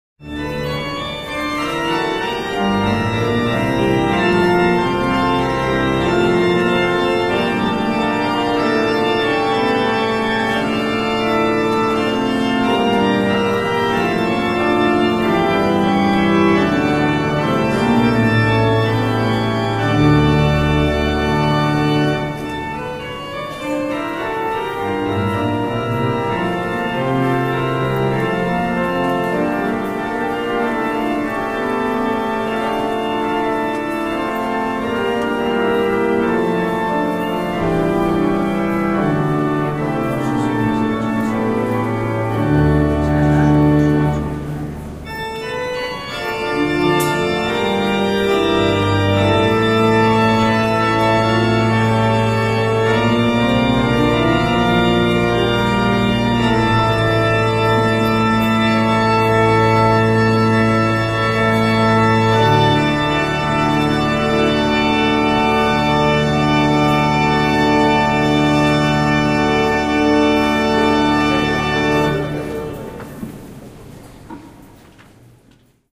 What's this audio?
Pipe Organ of the cathedral of Santiago de Compostela
3/8/2011 - Fifth day
Recording of the organ of the cathedral of Santiago de Compostela playing some classical piece during a liturgy. Although the recording does not capture the full power of the sound of the organ, it is still quite nice.
Recording was made with a Zoom H4n.
cathedral, church, liturgy, live-music, organ, pipe-organ